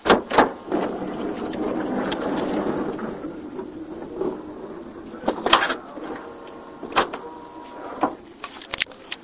An electric door on a Mini-Van shutting.